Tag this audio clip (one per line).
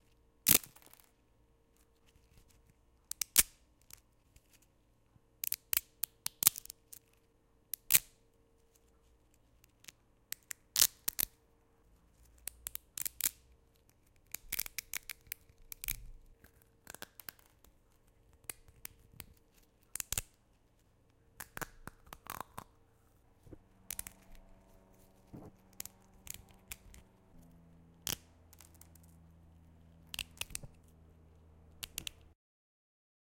breaking
tree
branch